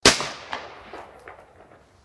This is real shot sound effect that I've recorded on shooting range. Sound of 9MM.
9MM, Pistol, Shot